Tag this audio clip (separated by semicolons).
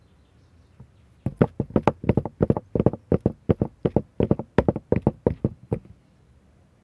footsteps; steps; walking